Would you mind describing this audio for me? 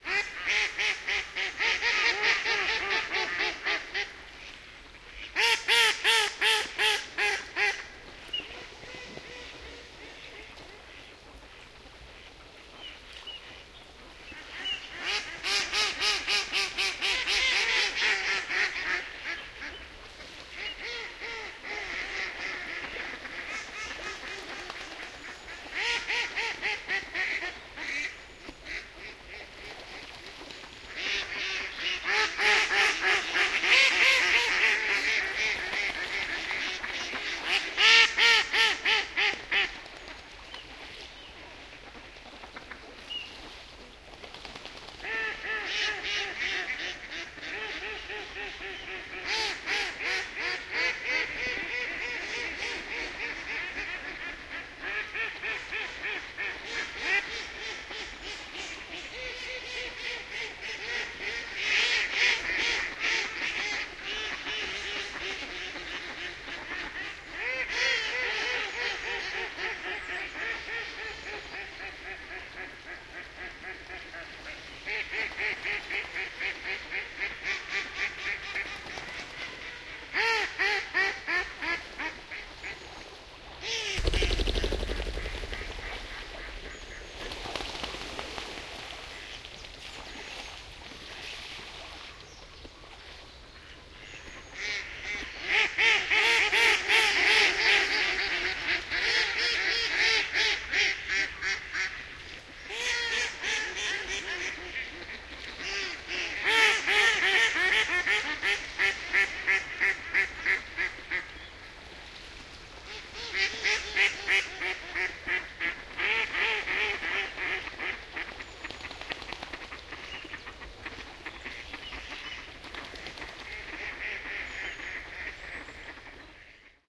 North Yorks Mallard Frenzy
A binaural recording of Mallard ducks at a fishing lake in the wilds of North Yorkshire (near Helmsley). There is an unexpected Pheasant 'take-off' at 1:24.
Recorded using a home-made pair of Primo EM172 binaural mics into a Zoom H2.n.
ambiance, binaural, duck, field-recording, Mallard, North-Yorkshire, Primo-EM172, Zoom-H2n